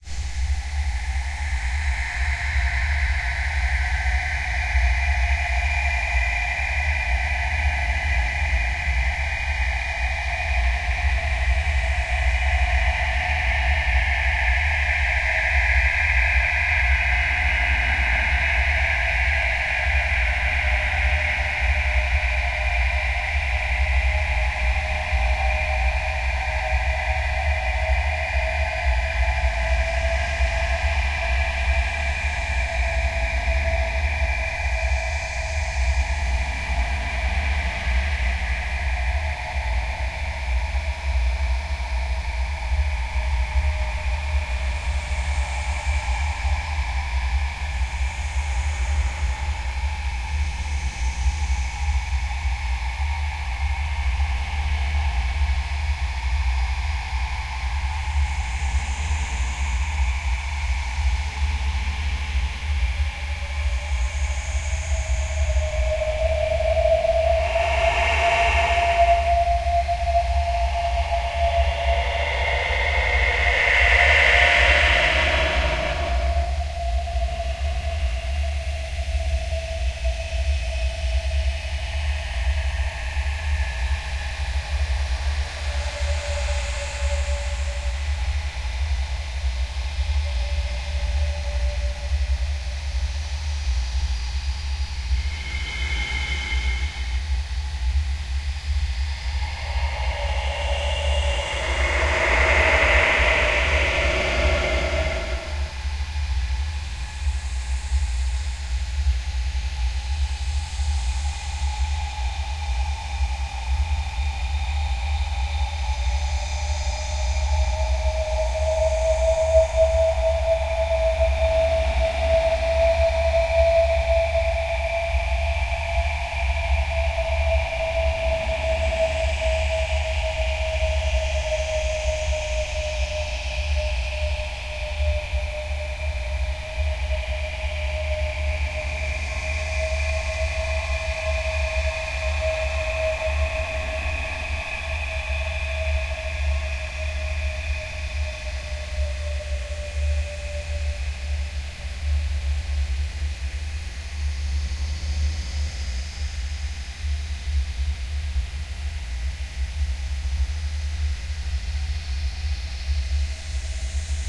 This was created with Paul's Extreme Sound Stretch program, my voice, and Audacity. I whispered a couple of times into the microphone and slowed it down a good bit in Paul's Stretch program. I think the result is pretty creepy!
Edit -- 8/23/10 -- Whoa, did NOT know that the file size was so big. Sorry about the huge file size, I'll try not to have them that big in my future sounds.